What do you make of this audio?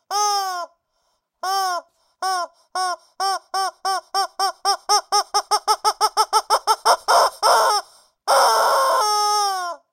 rubber chicken03
A toy rubber chicken
honking, honk, toy, screaming